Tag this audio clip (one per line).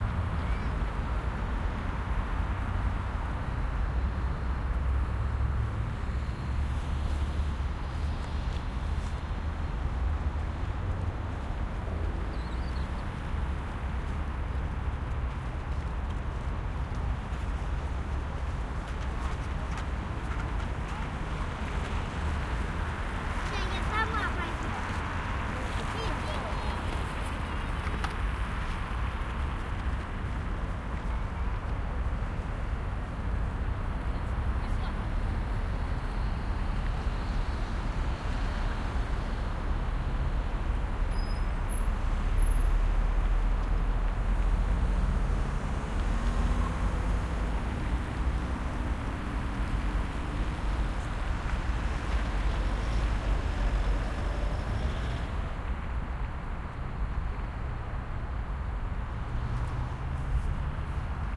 children
moscow
street
traffic